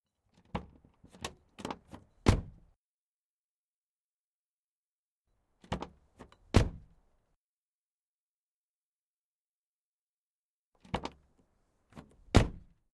Close perspective, inside